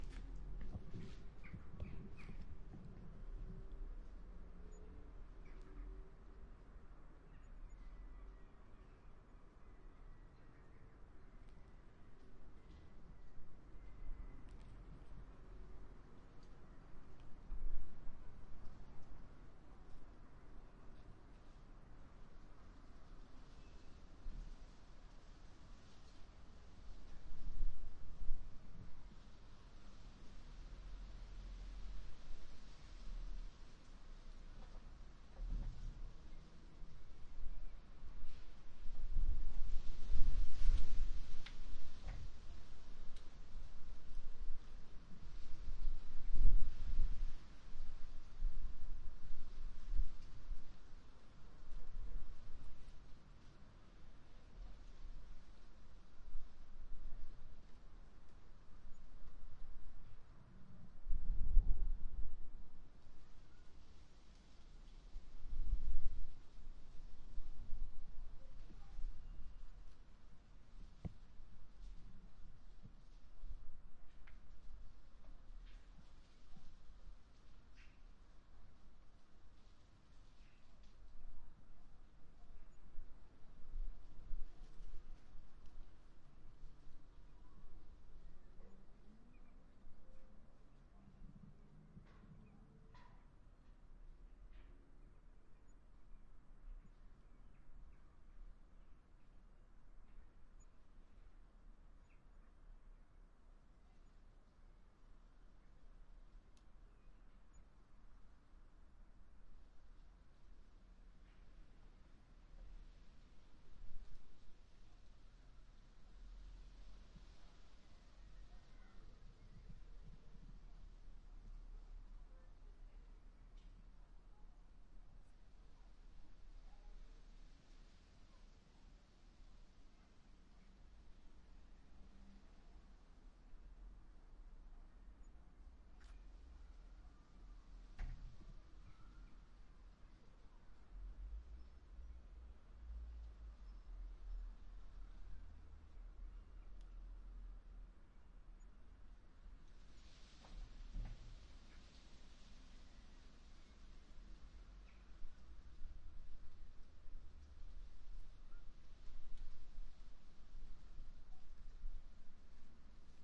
Binaural Outside Ambience
Recording of the Ambiance in my back garden. Recorded using a 3Dio Free Space Pro.